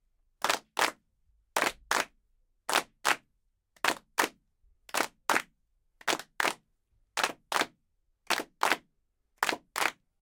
It was recorded by professionals in the studio.
Recorded with Pro Tools 9, interface digidesign 192, mic neumann u87.
Kiev, Ukraine